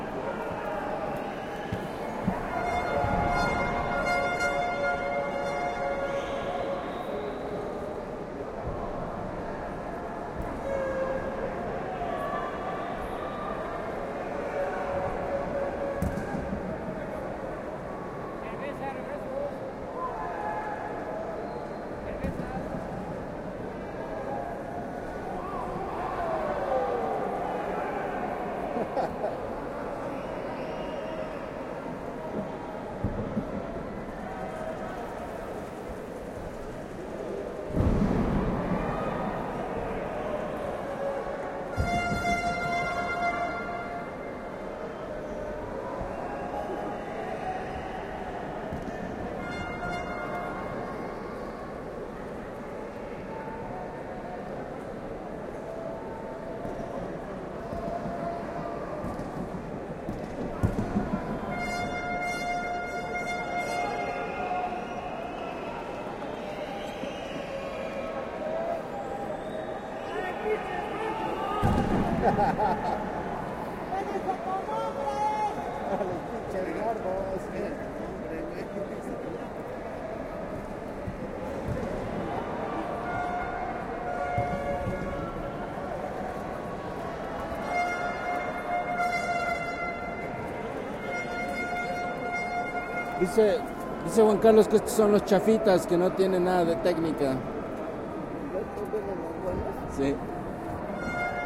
Sounds recorded at the Lucha Libre - Mexican wrestling... we were sitting near the front. 'Super Porky' was one of the luchadores… Mexico City, December 2013. Recorded using Roland Edirol digital voice recorder.
wrestling, Luchadores, Mexico, Libre, Lucha